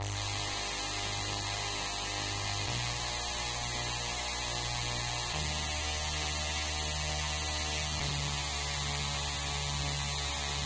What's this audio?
standard lofi hiphop pad